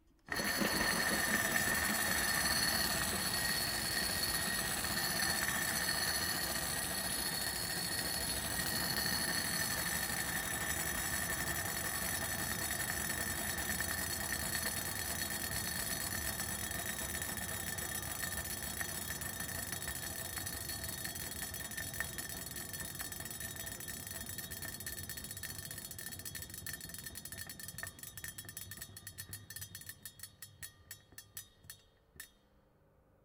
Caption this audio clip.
Metal Bearing rolling spinning Start to Stop continuous Slow down creaky